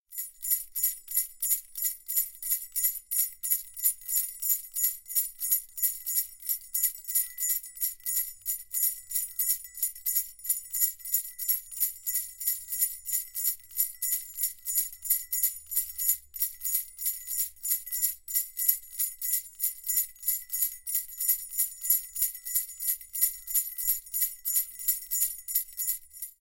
Sleigh bells sound produced by rhythmic shaking of a ring with 6 bells (percussion instrument for children).
Zoom H4n
christmas, sleigh-bells, reindeer, bells, sleigh, jingle-bells, winter